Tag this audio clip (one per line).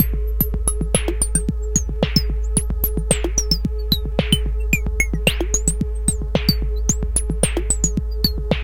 rythms
electro
clavia
synth-beat